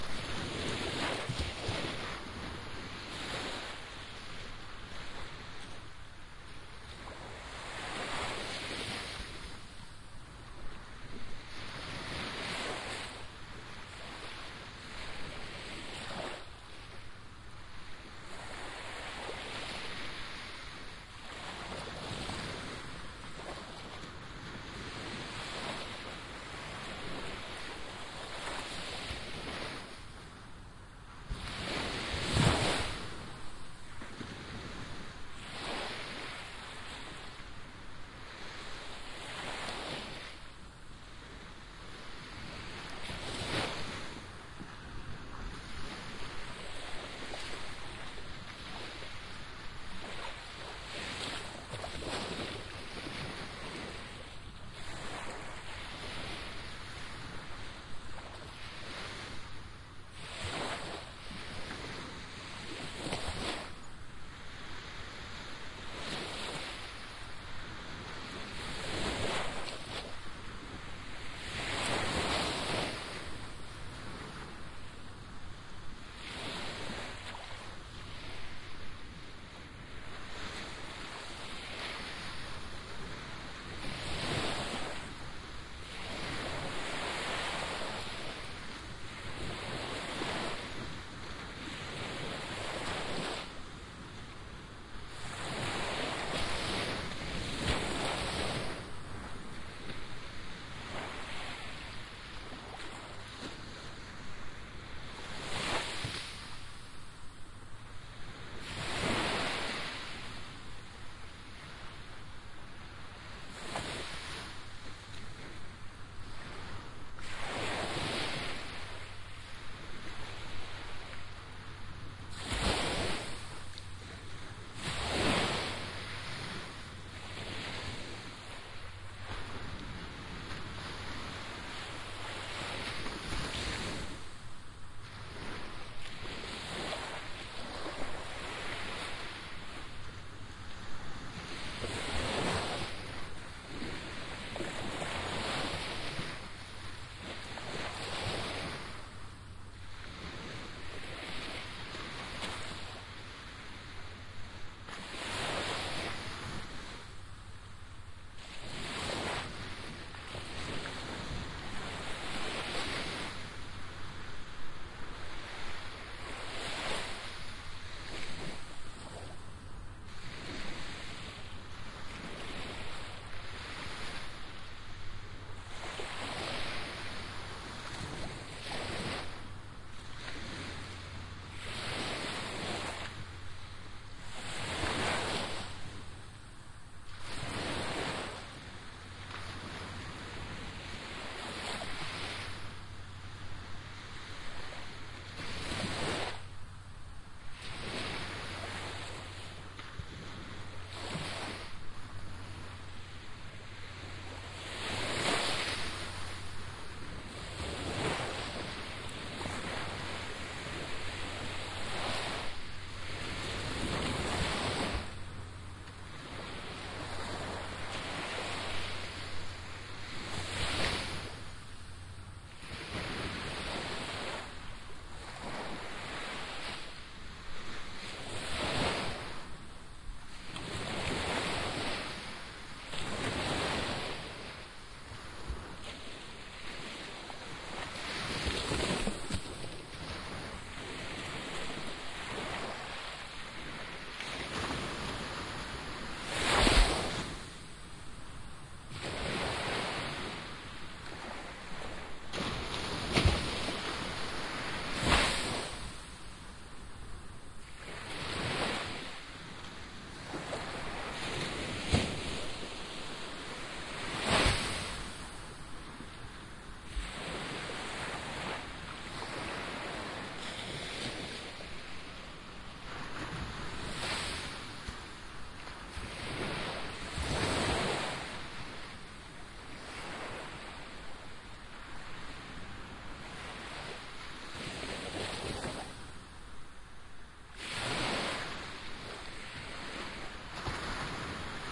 2006 04 13 sea at empuria brava
Standing on the beach in Empuriabrava, at night, recording the surf. The camping was really commercial, but at night the beach was deserted. Recorded with soundman binaurals, the result is very stereo as you can hear the waves crashing all around me.
surf, waves, field-recording